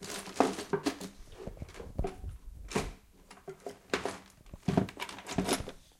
Rummaging through objects in distance